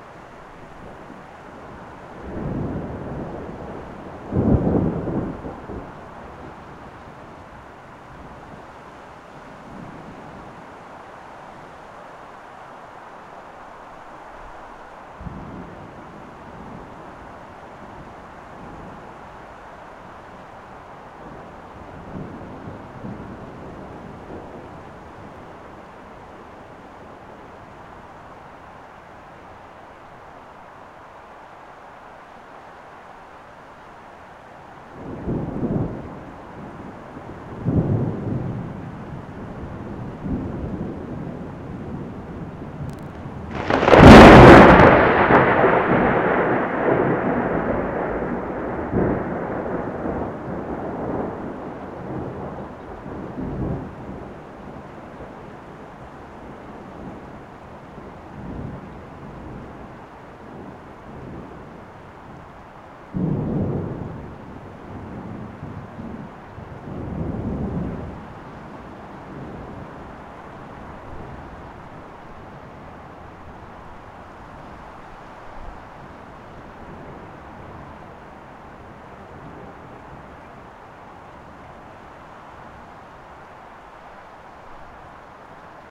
too close thunder clap
A single thunder clap and rain with a lot of distortion and over peak level. Pity but you can hear the radiowave crackle produced by the bolt it self, then you here the audio a second later. This was CLOSE. - Recorded with a high quality mic direct to computer.
field-recording, lightning, nature, rain, storm, thunder, thunder-storm, weather